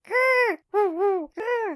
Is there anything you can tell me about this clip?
A 3 grunt loop of female sounding gibberish.
The individual grunts are available too so you can create your own loops.